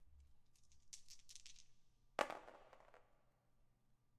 Dice-roll
A dice roll with three dices
Dice, Dices, Roll